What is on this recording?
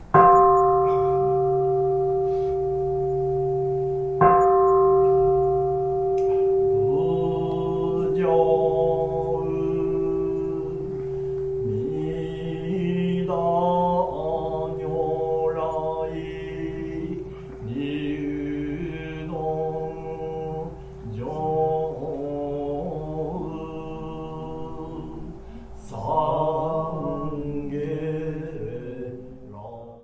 buddhism sutra1
Buddha, Buddhism, Buddhist, holy, Japan, meditate, monk, pray, prayer, religion, sutras, temple